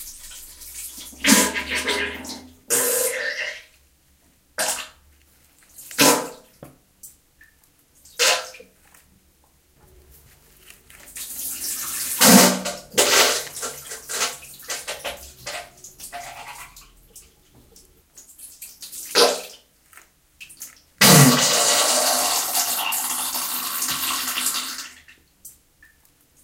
Diarrhea sounds recorded in the WC. WARNING! Really disgusting sound.